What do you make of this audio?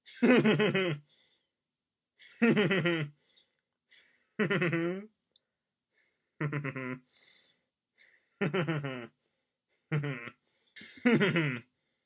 A series of male chuckles.